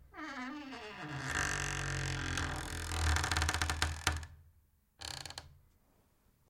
Big door creak
A short clip of a wooden door opening, first a high pitch creak, then a low pitch as it's movement slows. Recorded at close proximity with a Sennheiser 416 microphone, to a Sound Devices 552, in a residential, fully attached house, with minimal noise and background but natural ambience and reflection, from a large (bed)room.
Creak, Door, Groan, Household, Inside, Interior, Old, Open, Squeak, Wooden